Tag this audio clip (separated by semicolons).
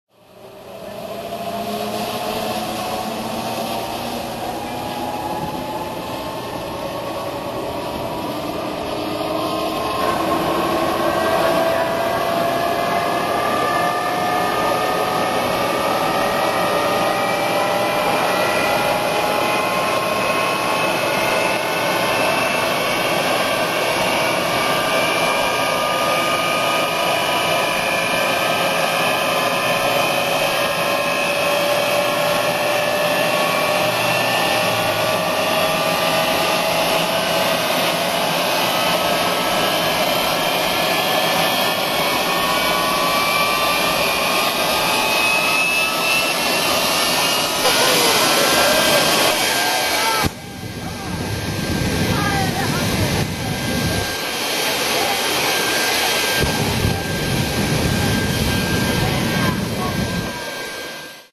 automotive; engine; field-recording; medium-quality; race-track